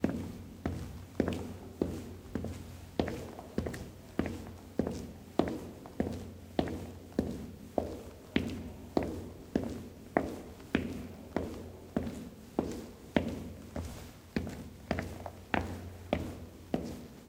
Footsteps stone + sneaker
High sneakers on a stone floor with a nice large reverb. Men walking. Foley for stone, rock, concrete, cement, hard floor of a big room. Mono recorded with sgh-6 on h5.
cement, concrete, feet, floor, foley, foot, footstep, footsteps, ground, hard, rock, step, steps, stone, walk, walking